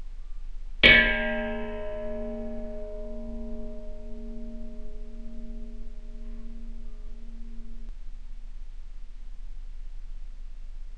A recording of a simple metal kitchen bowl, hit with a wooden spoon.
Recorded with a TSM PR1 portable digital recorder, with external stereo microphones. Edited in Audacity 1.3.5-beta
bell, bowl, dong, kitchen, remix, slow, slower, wooden-spoon